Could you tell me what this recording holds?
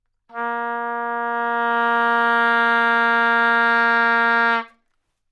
Oboe - A#3 - bad-dynamics-bad-timbre-bad-pitch
Part of the Good-sounds dataset of monophonic instrumental sounds.
instrument::oboe
note::A#
octave::3
midi note::46
good-sounds-id::8113
Intentionally played as an example of bad-dynamics-bad-timbre-bad-pitch
single-note, oboe, multisample, Asharp3, good-sounds, neumann-U87